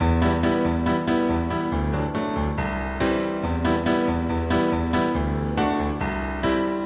Piano 4 bar 140bpm *4
Just a quick loop for your consumption. Copy/Sell/Enjoy. This is 140bpm with the intention of sneaking this into a reggae piece. The very best of luck to you.
Recorded into Logic Pro 9 using a Casio CDP-120 digital piano as a midi controller to trigger the Logic Pro Steinway Piano. Added a little reverb with Logic Pro Reverb Designer
Best regards,
reggae, loop, piano, bpm, bar, 4, 140, or, bmp, dubstep